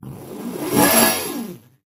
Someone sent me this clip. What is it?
BS Zip 5
metallic effects using a bench vise fixed sawblade and some tools to hit, bend, manipulate.